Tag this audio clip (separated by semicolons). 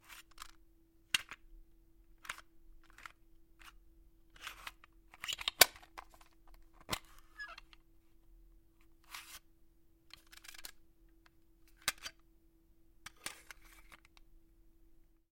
cassette; foley; movement